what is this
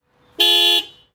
Sound of horn in Honda Civic recorded in Warsaw with yellowtec
car, horn